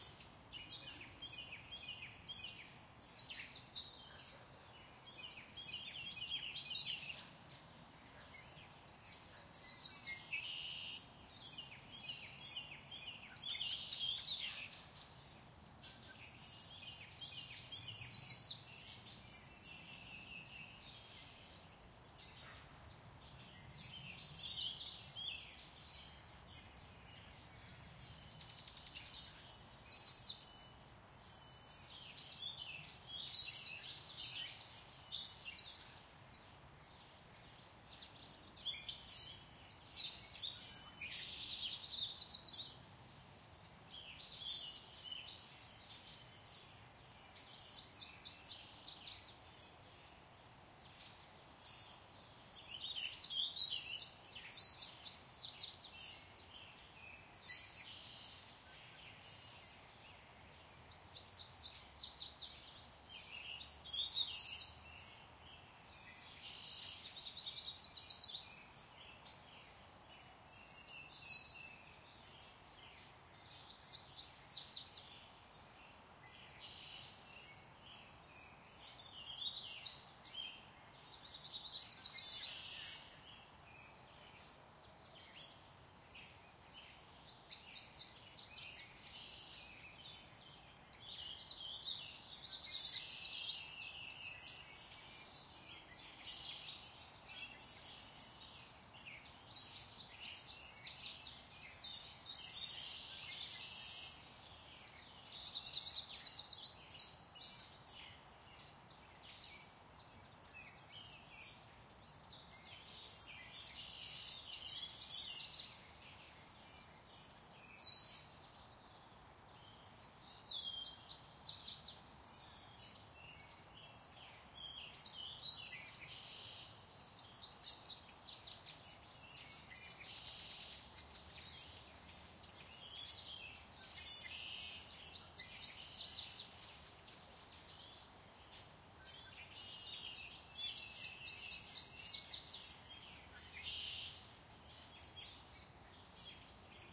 Spring Birds 2019 (processed loop)
Similar to my popular 2016 recording. Many birds are audible, as well as distant dogs. This clip is highly processed, for a much less processed version, check out the raw version of this track. Recorded during the 2019 World Series of Birding competition in NJ.
Two EM172 mic capsules -> Zoom H1 Recorder -> High-Pass Filter -> Noise Reduction
new-jersey, ambience, zoom-h1, relaxing, bird, birdsong, daytime, nature, spring, forest, h1, loop, ambiance, EM172, field-recording, ambient, birds